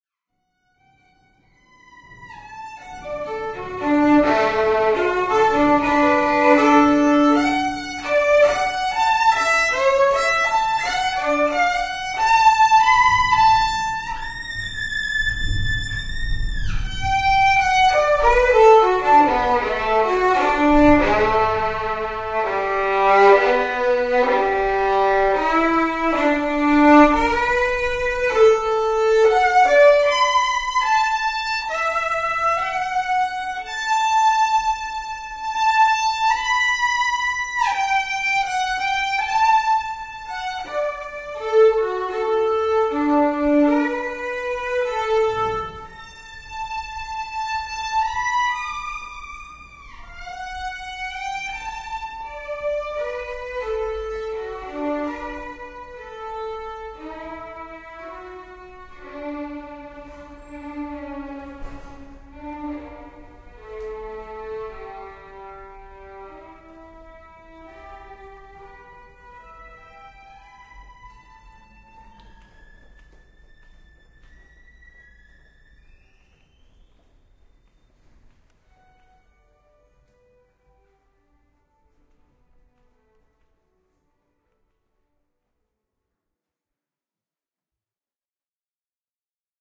Violin in the hotel
Recording of a Violin